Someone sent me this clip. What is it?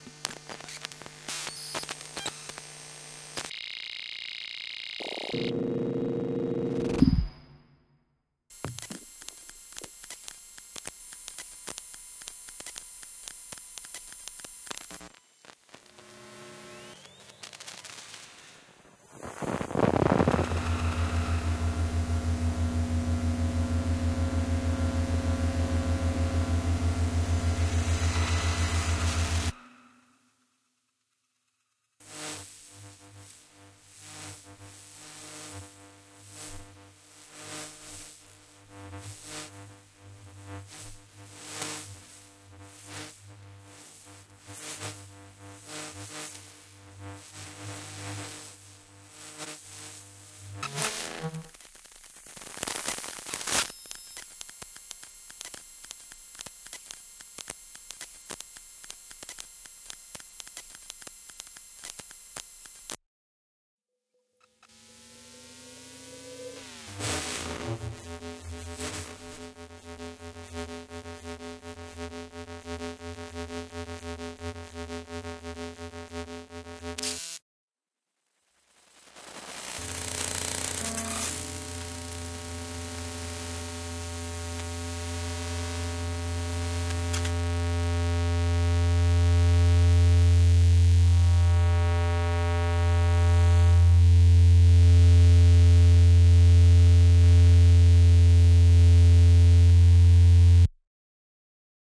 carmelo pampillonio emf reel
Created by Carmelo Pampillonio for use in the Make Noise Morphagene.
The chaotic vibratory ecologies of electromagnetic fields emitted from all the technological instruments and interfaces involved in this project. These ambient broadband measurements were taken with various EMF sensors, where the discharges of imperceptible energies are rendered sensible as symphonic microsounds which impart the extent to which our surrounding spaces are not simply passive backgrounds. The art of magnetic field recording is intriguing precisely because it makes us privy to vibrations of an inhuman axis, making it a form of non-anthropocentric art.
Part of the “Geophysical Vibrations” Reel pack.
electromagnetic-fields, emf, field, mgreel, nature, rain, resonance, seismic, seismograph, very-low-frequency, vlf, wind